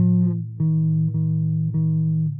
recording by me for sound example for my course.
bcl means loop because in french loop is "boucle" so bcl